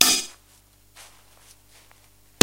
The dungeon drum set. Medieval Breaks
dungeons, idm, dragon, medieval, breaks, amen, medievally, breakcore, breakbeat, rough